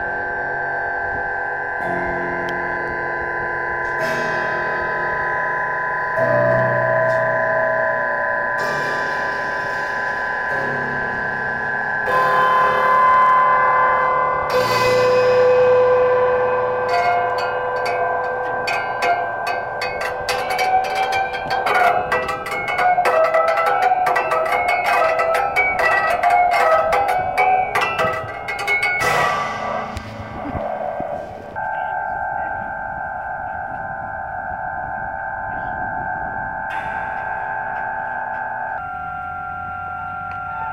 sound sculpture noise troy ohio004
Michael Bashaw of Puzzle of Light. Wind was resonating the strings and you can hear sirens and traffic noise throught the frame.
lowstrings, sculpture